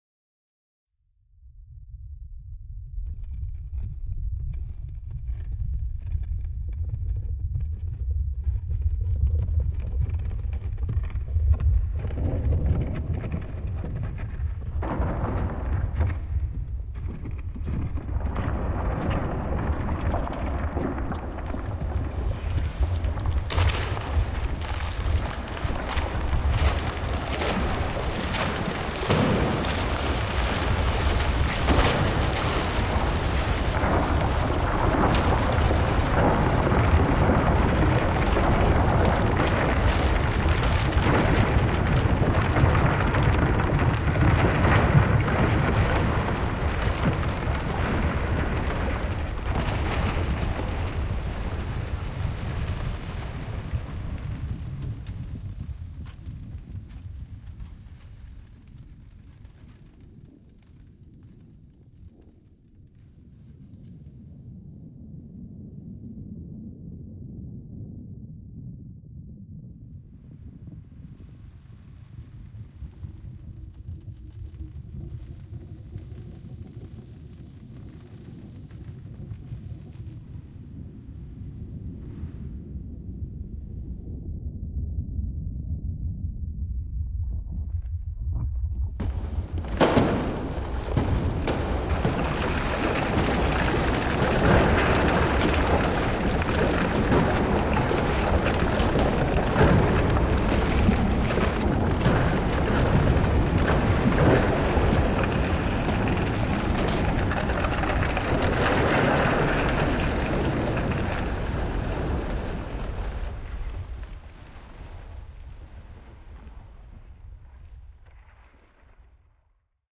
Foley recording of a collapsing tower. This sound is a mix of: [plaster panels, falling gravel and bricks] for debris, [large sheets of aluminium / plastic], for heavy wind and sub bass. This sound has been used during a 97 meters high video projection on the surface of the Asinelli tower, in Bologna (Italy), October 10th 2009. The source sounds have been recorded in a large warehouse using AKG C-480 B + AKG CK 69-ULS capsules, Audio Technica AE3000 and AKG C-1000 microphones. Digital delay and reverberation was added. Recordings were made by Fabrizio Cabitza with the help of Salvatore Nobile. Mix and sound design by Pier Luigi Rocca. Hardware equipment: MacBook Pro and an RME Fireface 400 card. Software: Ableton Live.